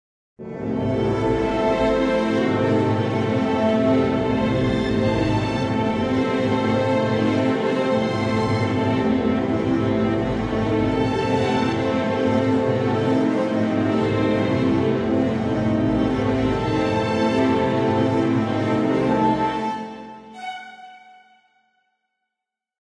Railway Voyage Calming Sea
This is the loop from Voyage by Steam where everything starts to calm down again after a rough section.
steamship, cinematic, atmosphere, music, loop